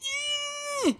long grunt
strained
Do you have a request?
grunt, strained, long